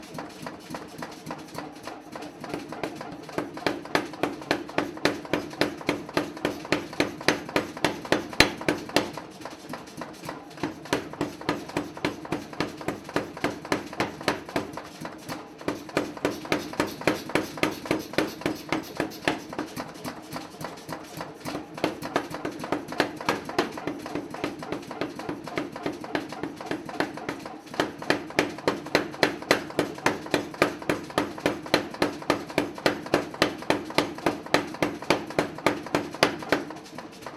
Billeter Klunz 50kg forging hot steel.